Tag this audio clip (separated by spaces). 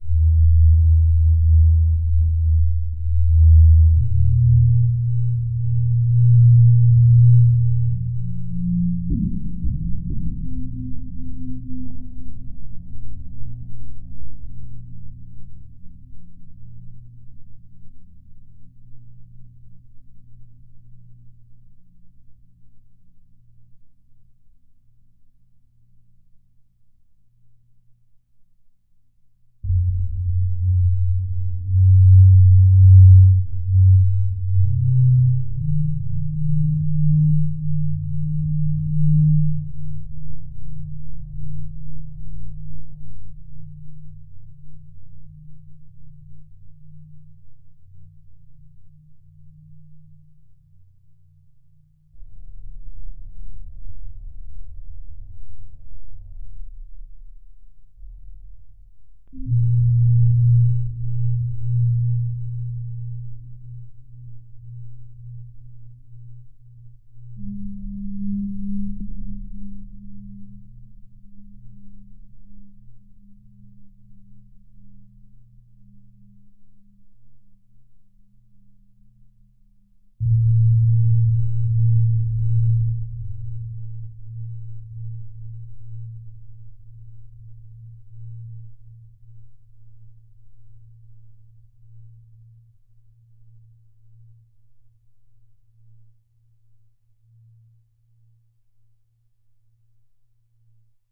sci-fi bass synthsizer ambience outher backgroung creepy deep remix electro pad dark suspence score space synth spooky soundscape soundesign ambient low illbient filter film atmosphere reverb processed soundtrack